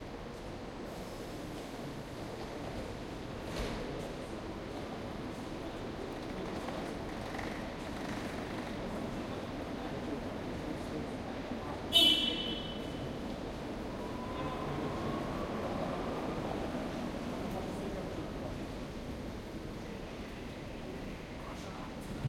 railway station 4
Krakow railway station ambience
trains, railway, train